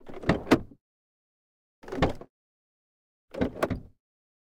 Vehicle Car Peugeot 308 Door Open Mono
Door Opening Interior (x3) - Peugeot 308.
Gear: Rode NTG4+.